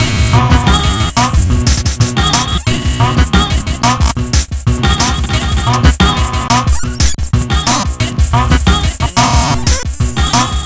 it's funky:-)
Processed beat loop with voice
voice, beats